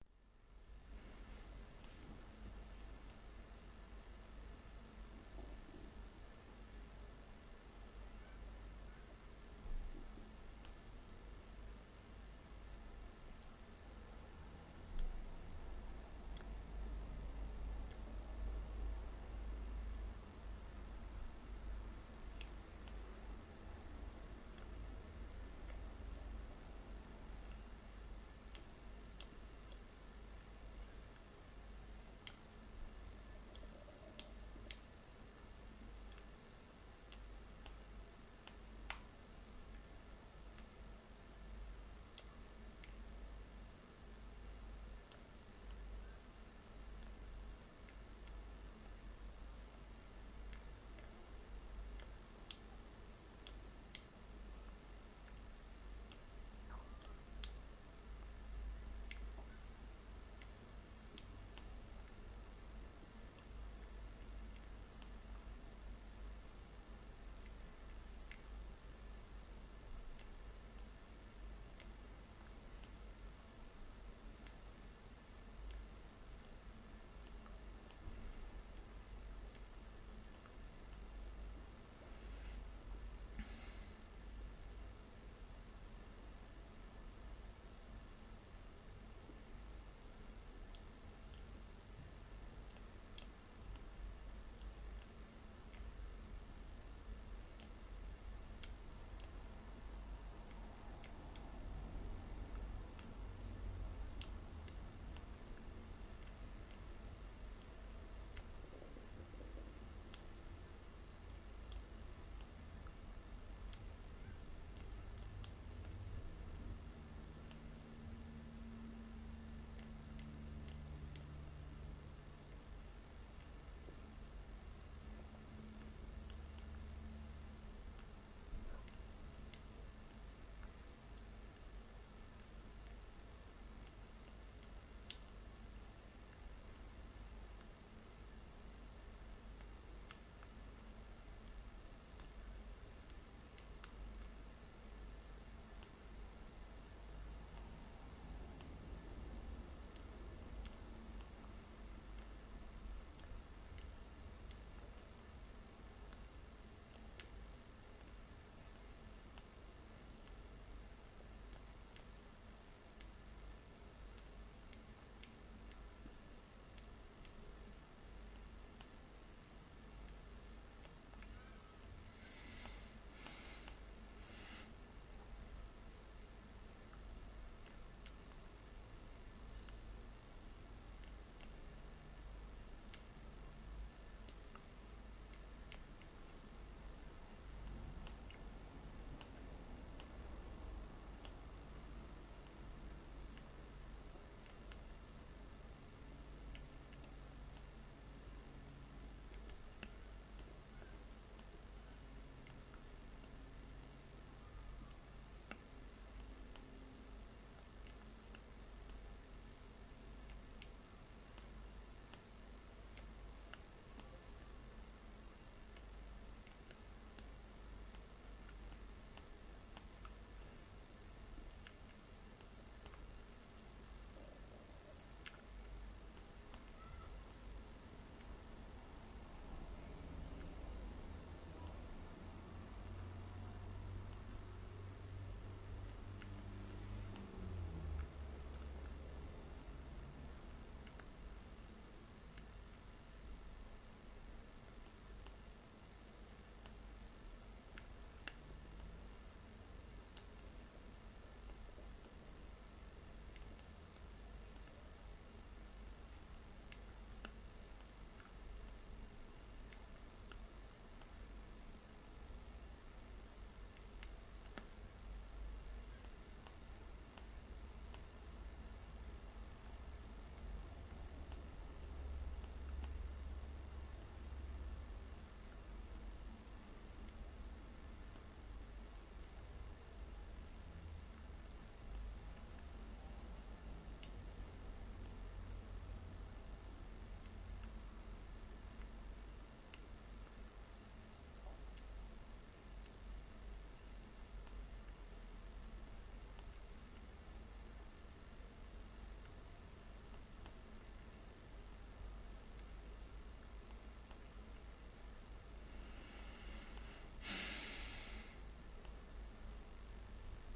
ECU-(A-XX)173 phase1
Engine Control Unit UTV ATV Trail Path Channel Battery Jitter Full Band Spectrum Optical Convergence T2 T1xorT2 Chassis MCV Dual Carb SOx COx NOx Fraser Lens Beam Reluctor Flexfuel Power
ATV, Band, Battery, Beam, Carb, Channel, Chassis, Control, Convergence, COx, Dual, Engine, Flexfuel, Fraser, Full, Jitter, Lens, MCV, NOx, Optical, Path, Power, Reluctor, SOx, Spectrum, T1xorT2, T2, Trail, Unit, UTV